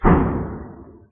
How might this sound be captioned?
Recorded roughly 900m from the source. This is the report of the impact/explosion.